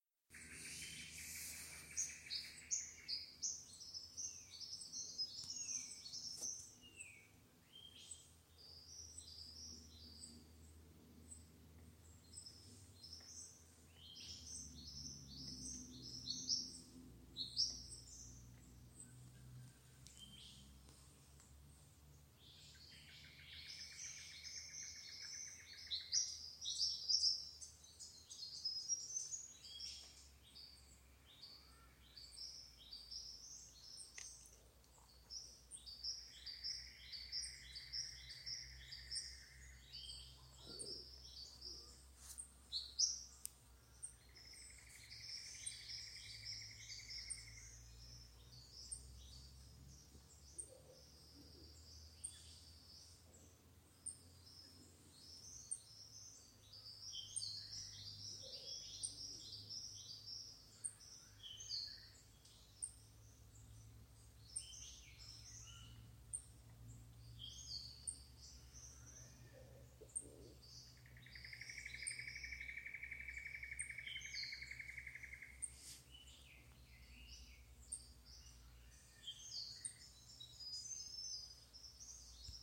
From the rain forest in Dorrigo National Park, NSW, Australia, October 2019